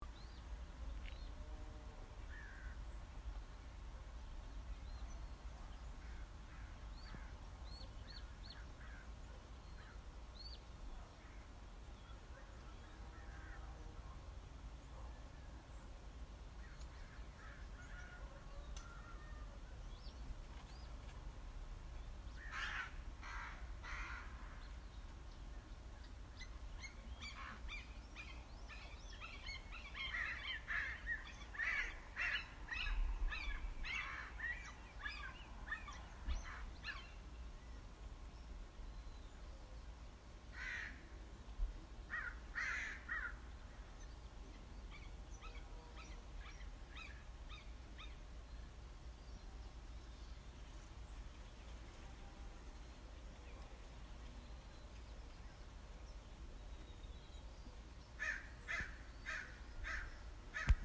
Red shoulder hawks and crows 03/02/2020
Couple of Red shoulder Hawks being attacked by crows